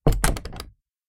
wood door close2
Closing an old wooden door from the 1800s.
close, wood